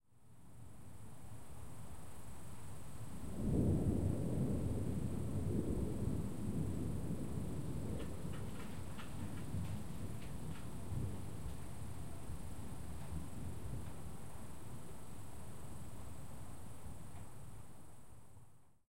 The sound of a distant thunderstorm. Please write in the comments where you used this sound. Thanks!
electricity, rain, storm, Thunder, thunderstorm, field-recording, weather, lightning, sparks, nature, phenomena, clouds, rumble, noise